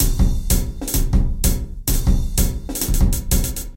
odd time beat 120bpm